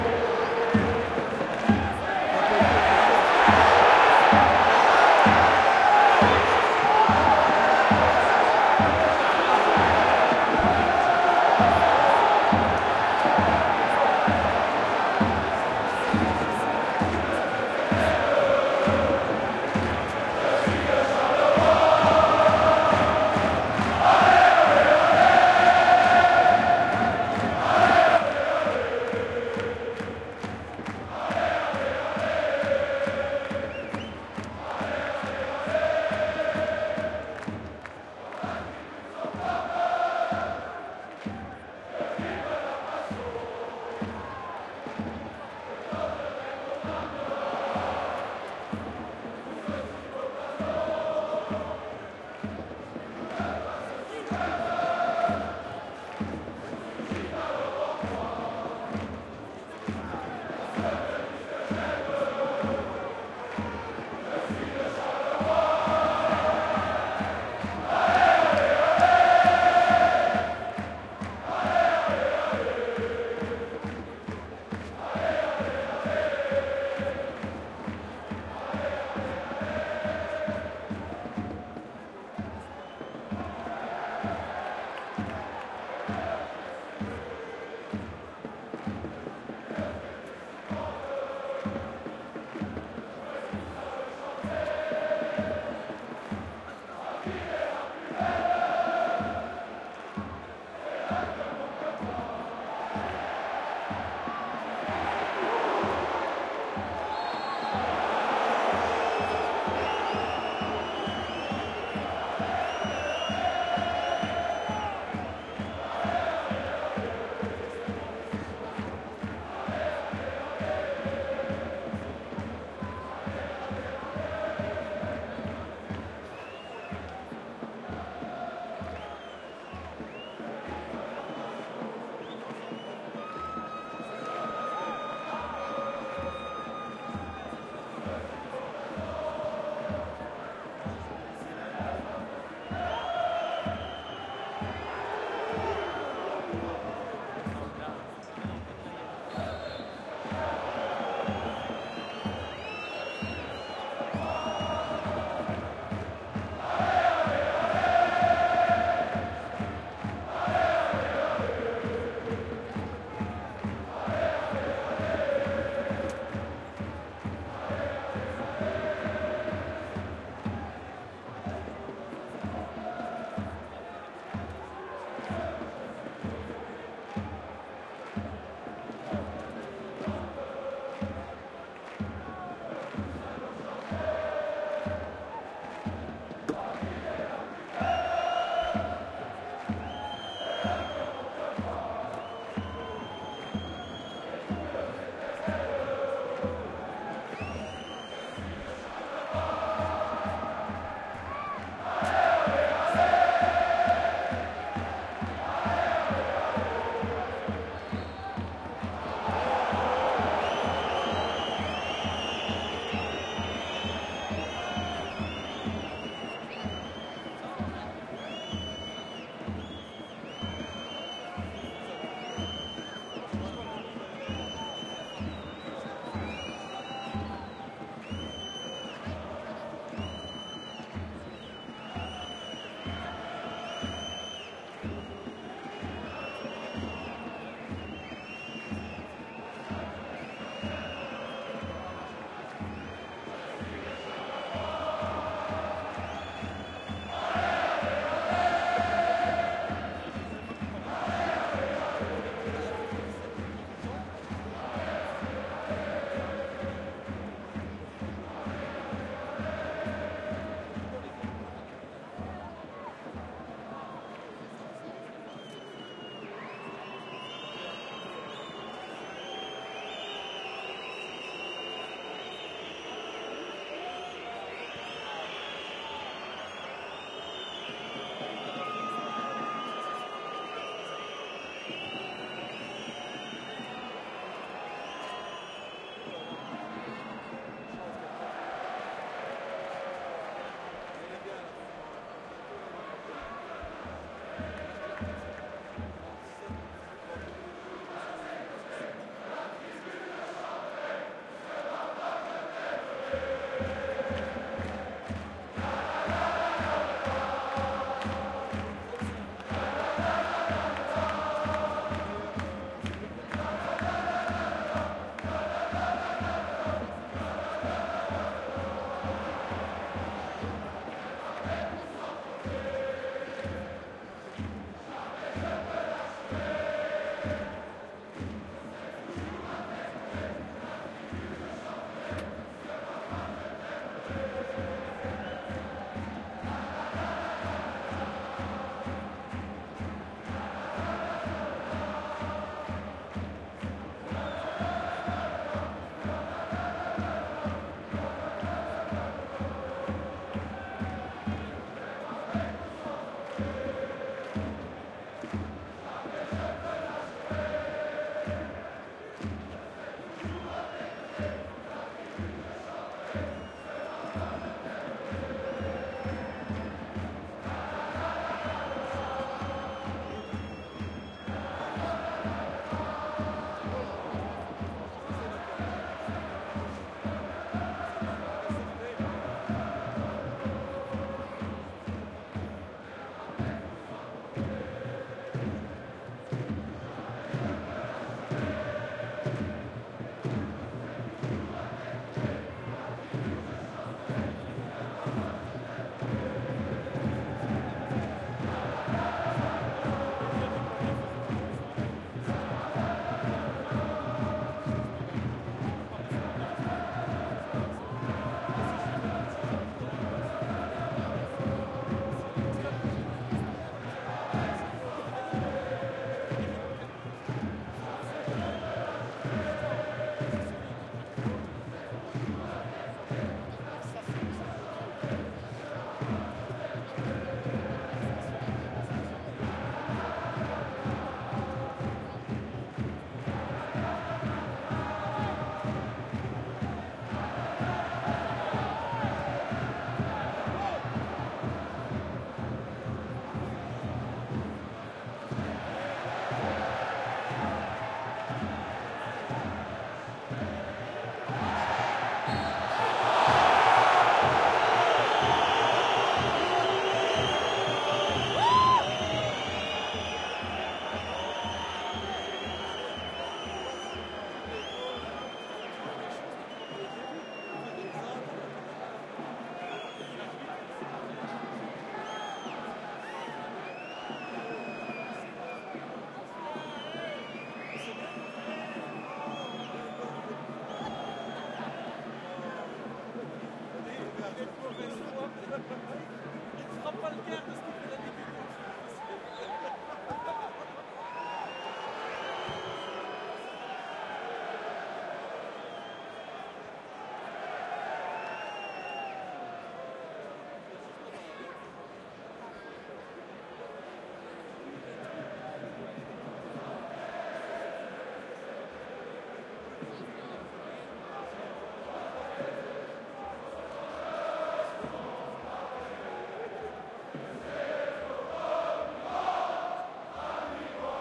Charleroi's Zebra supporters singing and reaction, around 30m away.
song, shouting, football, cheers, fans